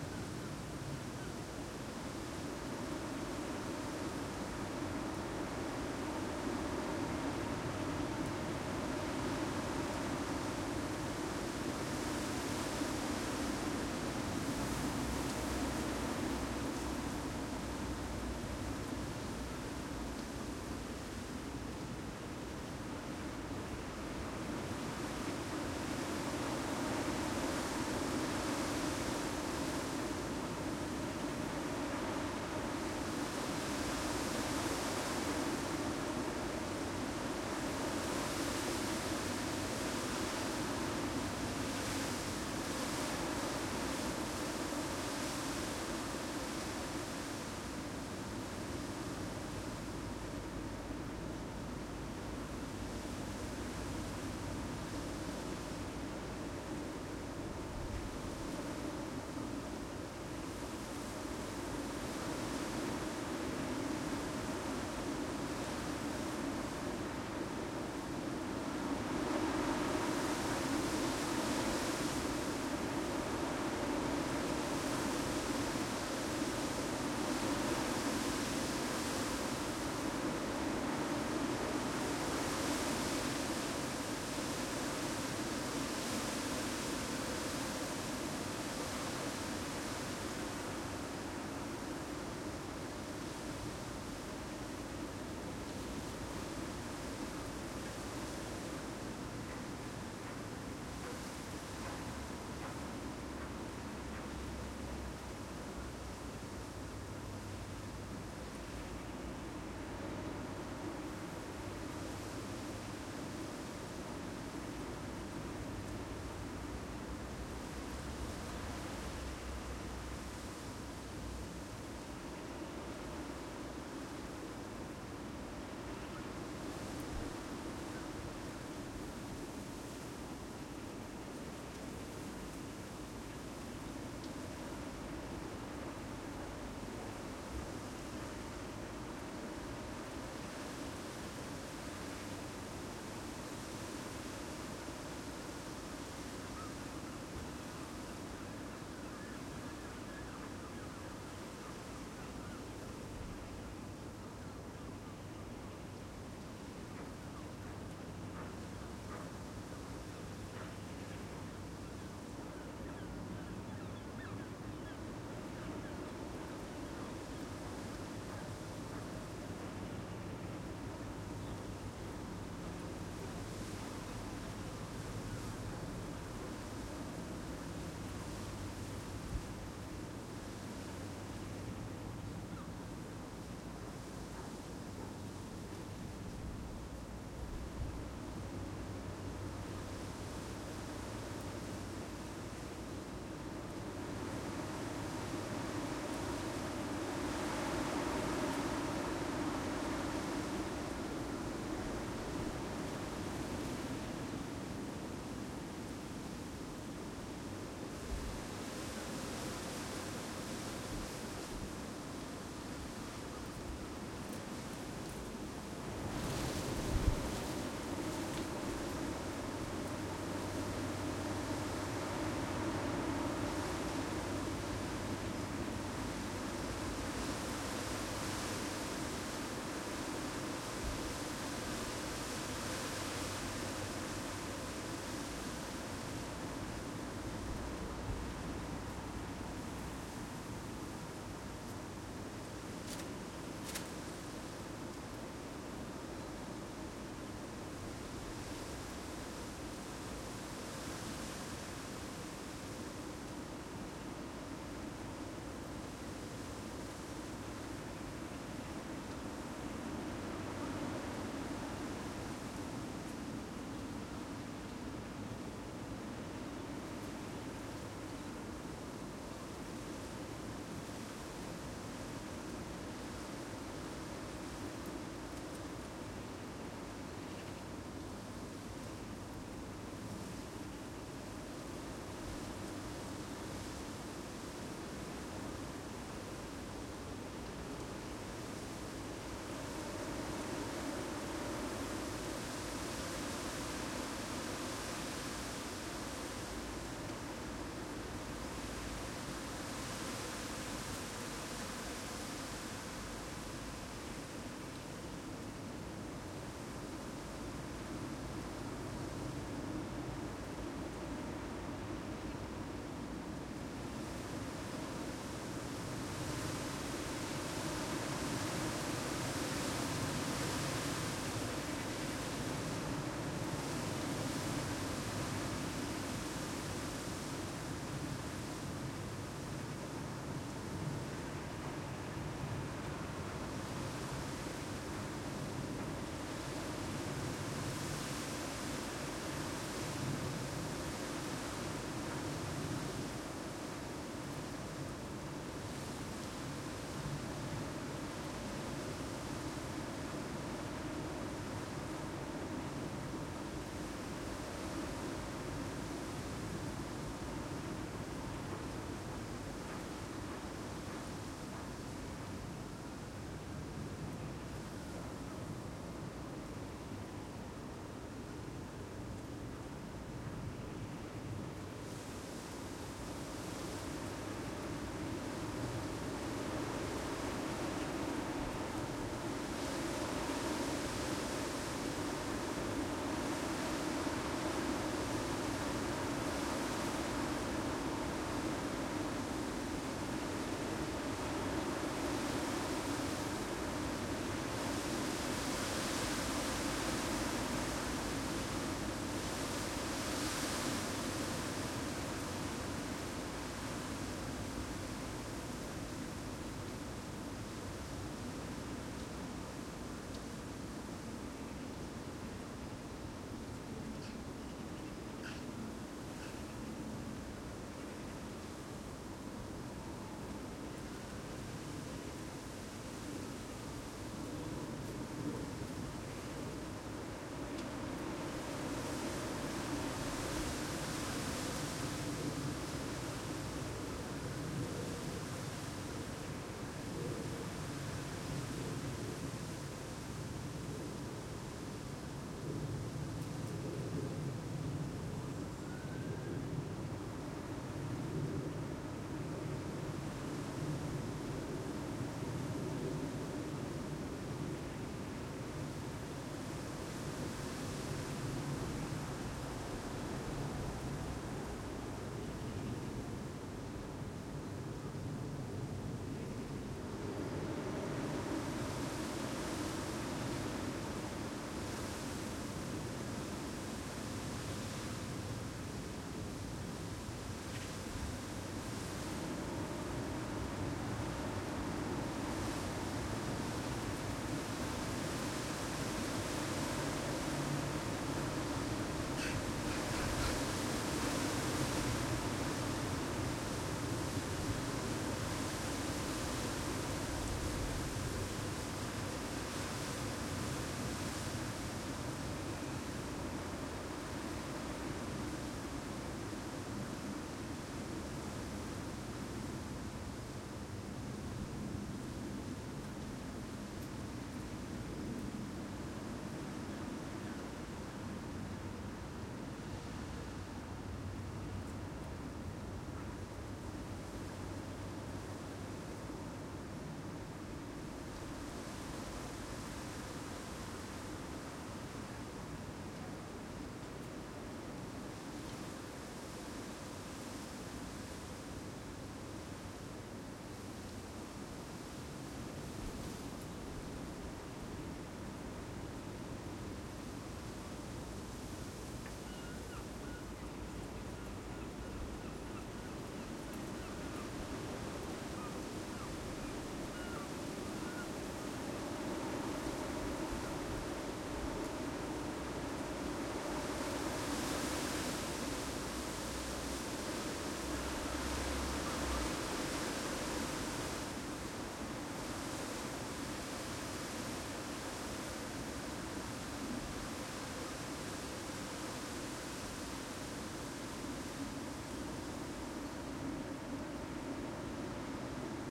Very windy
Really windy morning, wind blowing through the trees.
MixPre3 and Rode SVMX.
gale, wind, weather, trees, windy, gust